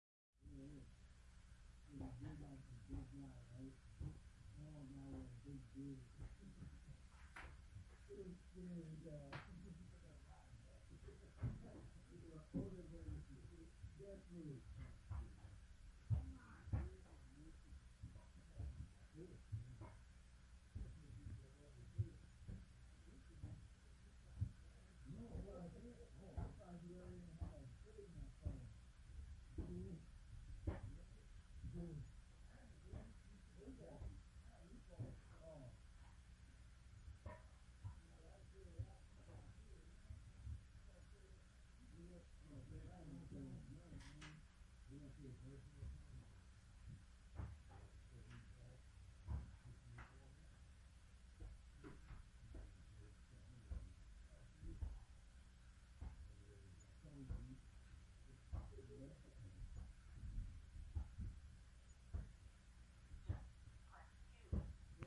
men working in the basement
men working on the floor in the basement
Hey! If you do something cool with these sounds, I'd love to know about it. This isn't a requirement, just a request. Thanks!
construction, male, people, voice